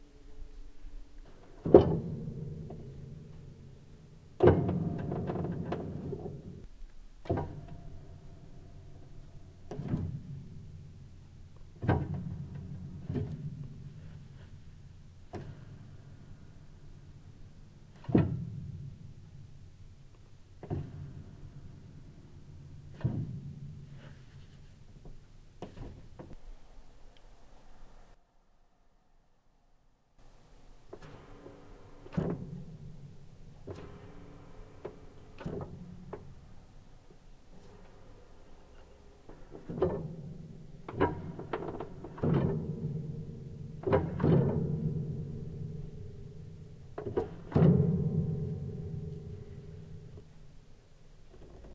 I recorded my squeaky old Baldwin Hamilton Upright piano pedal